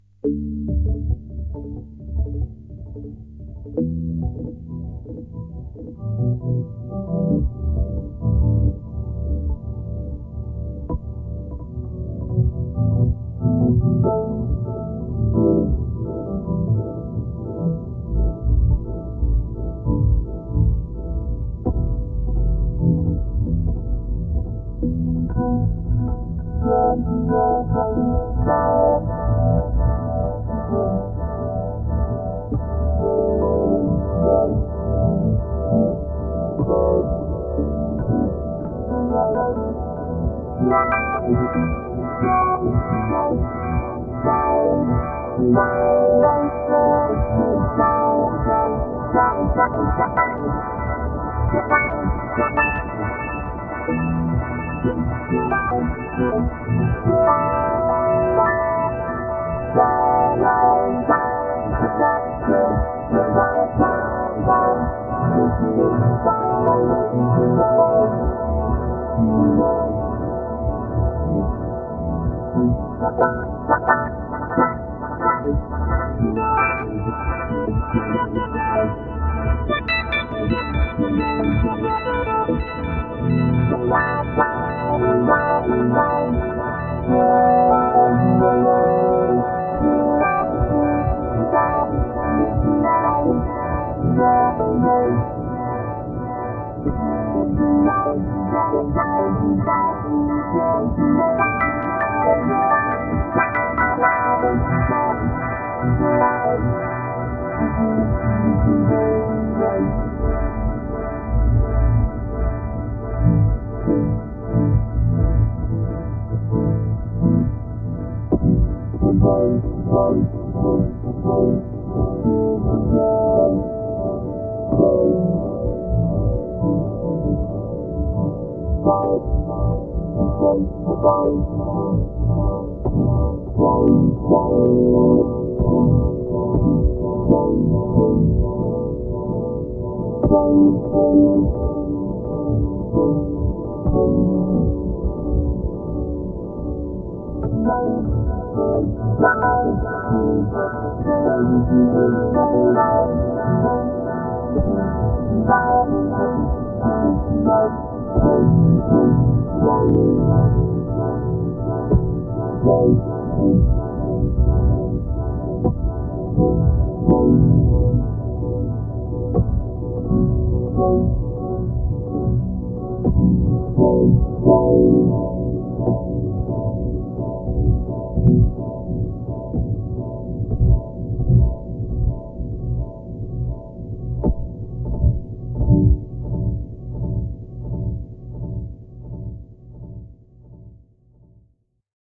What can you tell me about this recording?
s lounge wah organ 1
A lot of trying to make some loungeish sounds on my Casio synth. Maybe some fragments of this thing will be useful for you?